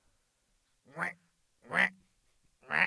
Human impersonation of a duck. Captured with Microfone dinâmico Shure SM58.
animals, quack, 3naudio17, duck